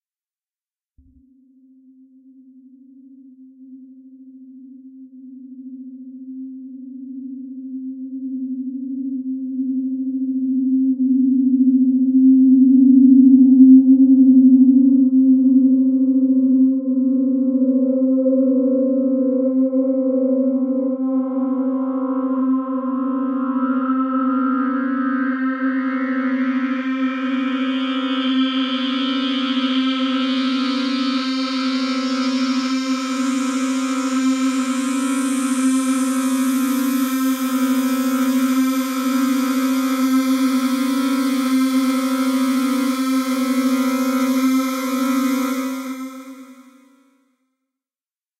Some freaky ambiance riser, used for spooky tension and release effect in a VFX animation.